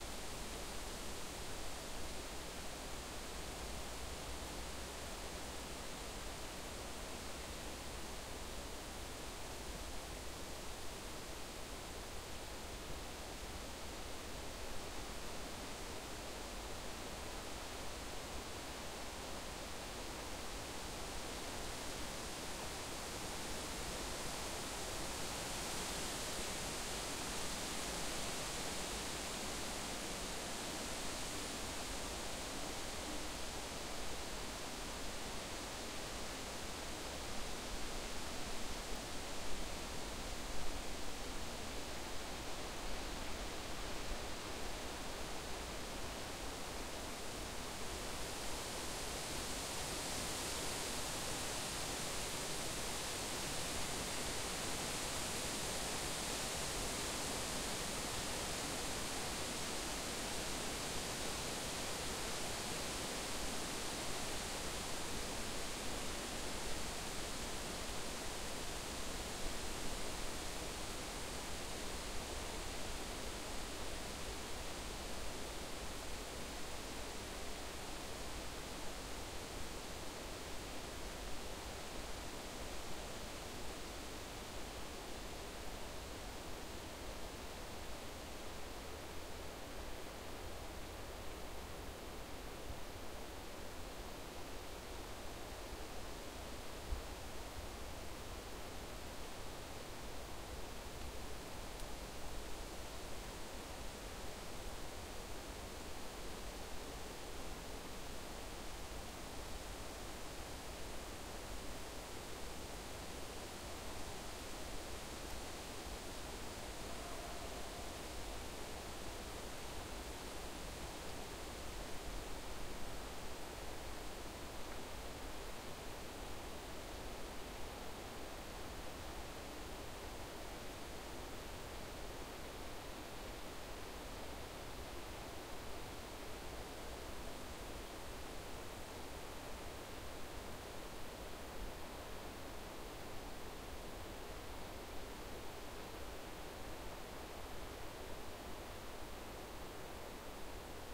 Early autumn forest. Noise. Wind in the trees.
Recorded: 2013-09-15.
XY-stereo.
Recorder: Tascam DR-40
ambiance, ambience, ambient, atmosphere, field-recording, forest, nature, noise, soundscape, trees, wind